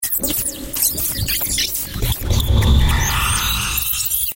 sound-design created from much processing of various samples with Native Instruments Reaktor
electronic, hard, harsh, industrial, noise, noisy, processed, sound-design, stab